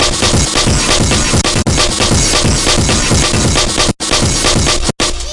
Breakcorey loops recorded around 270 Miles Per Hour. Took a few
breakbeats into Zero X beat creator and exported each piece (slice it
This file was run through Predatohm. These were pieces for an ambient song,yep.
breakcore, compressed, drums, harsh, jungle